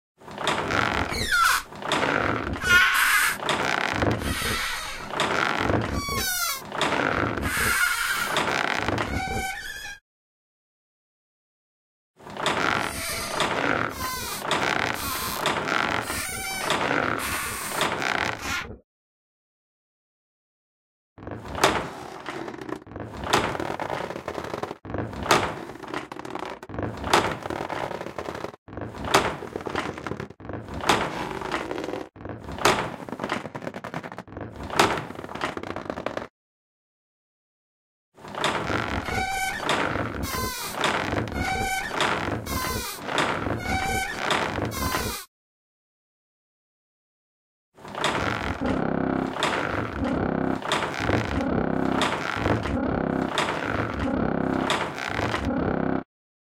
Wooden Crank, Handle with rope, winding
designed sound of a squealing wooden crank / handle, for example on a well, winding up a bucket of water. 5 different versions.
wood, squeal, squeak, well, foley, handle, crank, sounddesign, rope